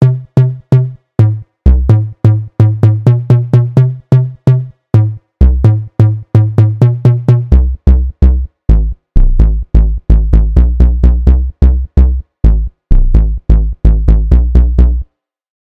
This sound was created using "Sylenth1" synthesizer
BPM 128
4x4-Records, Bass, Deep, Donk, EDM, Electro, Future, House, Loop, Minimal, Stab, Sylenth1, Synth, Synthesizer, UK, Wobble